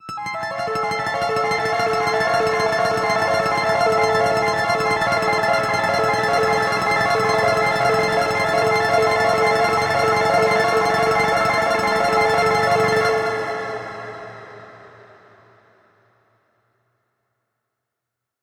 Sak tempo increased arp (85-180bpm)
arp, time-streched, sci-fi, sakura, loop, synth, electronic, uprise, arpeggio